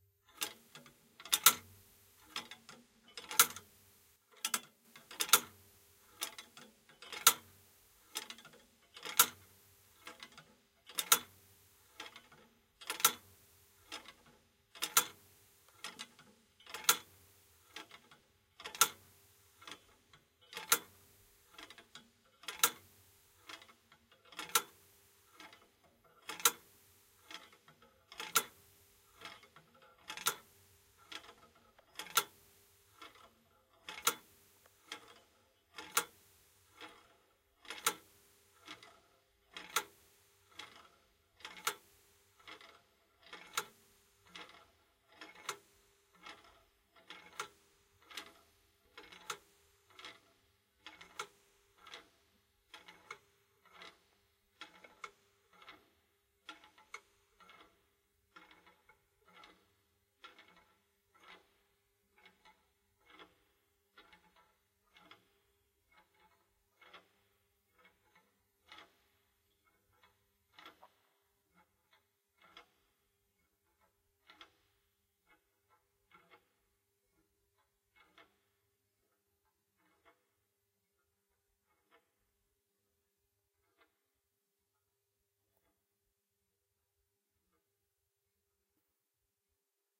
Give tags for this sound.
Childrens-toy; rocking-horse-and-cart-toy; Toy; Victorian-era-toy

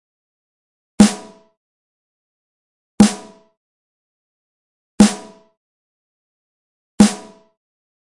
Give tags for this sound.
120bpm; beat; drums; hip; hop; loop; quantized; rhythm; rhythmic; snare

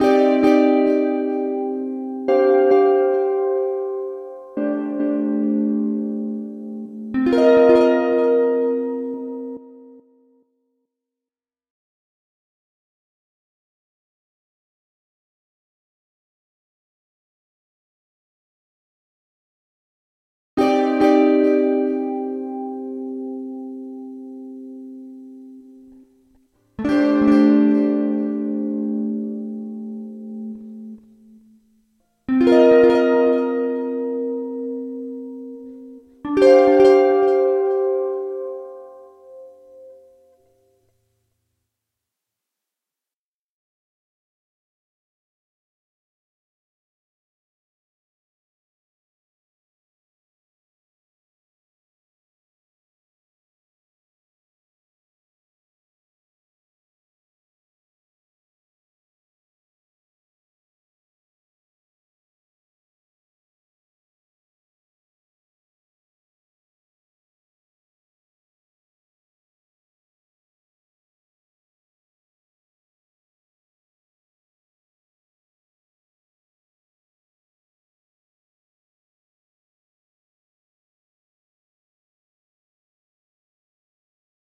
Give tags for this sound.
105bpm; ableton; clean; delay; electric; EMG-89; EMG-S; guitar; ur44; Washburn; wr150

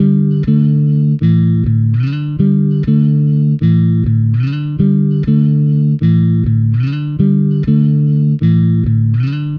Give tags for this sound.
Ableton-Loop; Bass; Bass-Samples; Groove

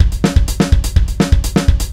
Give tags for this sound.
250; rock; beat; 125; short; percussion; real; heavy; bmp; jazz; loop; metal; drums

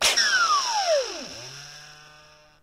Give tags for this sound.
clean; cleaner; dirty; hoover; industrial; mechanic; motor; vacuum

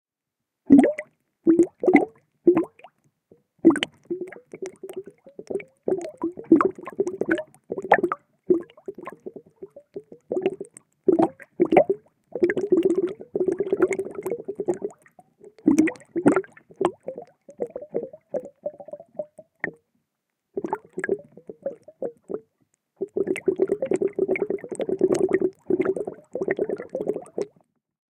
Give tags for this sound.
bubble; bubbles; bubbling; hydrophone; submerged; underwater; water

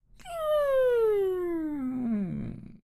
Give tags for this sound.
close; door; grinding

